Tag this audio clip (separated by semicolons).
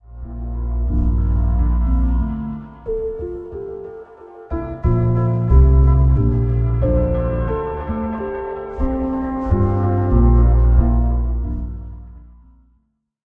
loop; beep; track; loops; sound; song; beat; music; Manipulated; created; dance; electronic; bop; electronica; Sample